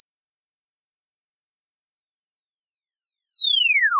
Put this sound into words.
A high-frequency electronic discord tone (sweeping from very high to high) suitable for ringtones and annoying dogs
annoy-dogs, bat, discord, electronic, high-frequency, ringtone, sweep